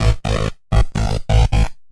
Bass SCSI 001
A treated SCSI interference pattern when using an old external SCSI hard-drive.
interference, SCSI, electronic, loop, noise, rhytmic, Bass